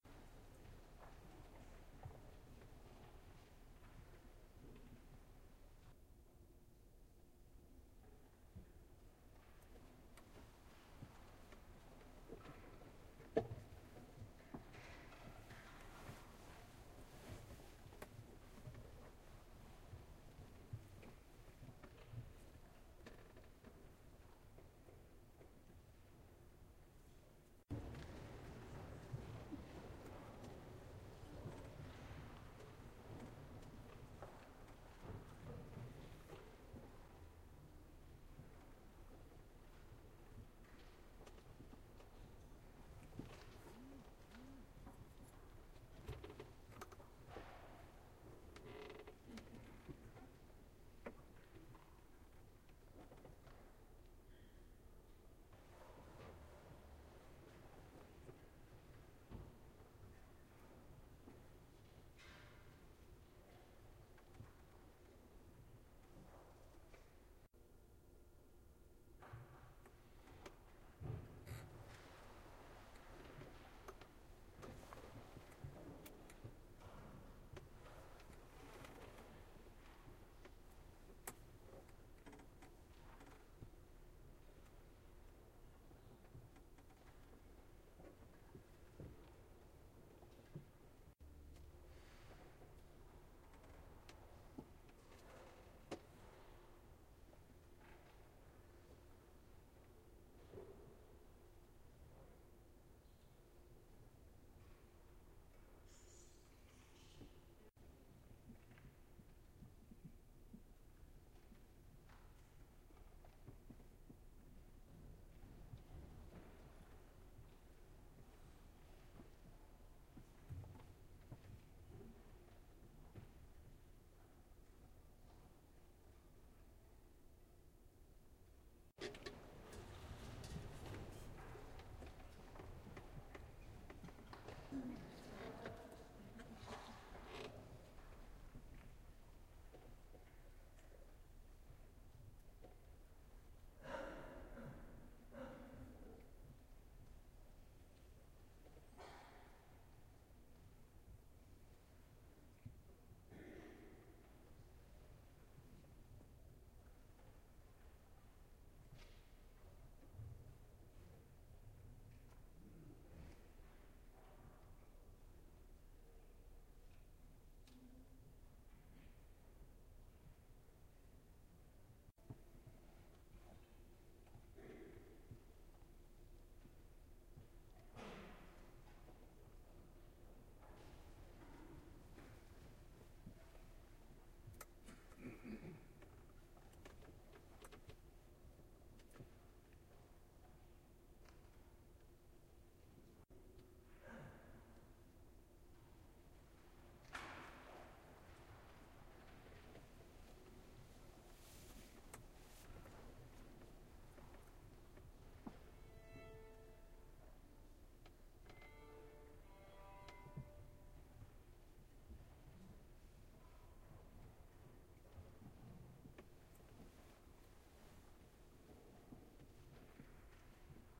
Concert Hall Silence Ambiance
A polite crowd making minute noises in an echoing concert hall. Made of multiple audio clips spliced together, in hopes that most transitions are seamless, and those that aren't can be easily edited. Taken with a black Sony IC recorder, at Boettcher Concert Hall with the Denver Young Artists Orchestra. God bless!
coughing, minimal, silence, background, concert-hall, ambiance, atmosphere, whispering, clear-throat, crowd